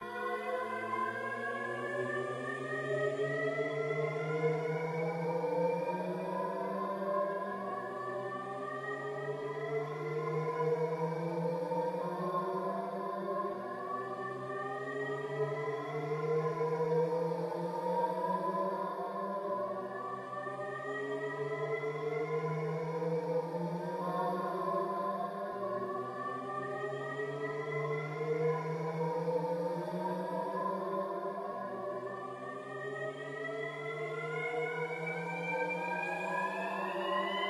Random Ghost, Shepard's Tone type of audio
ghostly, shepard